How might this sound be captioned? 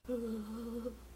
Short clip of someone shivering.